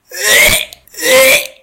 Somebody got sick and vomits.